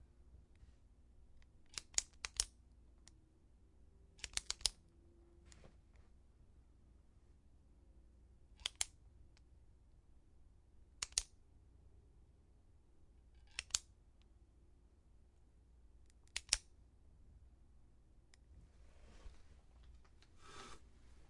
I clicked the hell out of a ball point pen for nervous tick SFX.
Equipment: SD552 & Sennheiser MKH50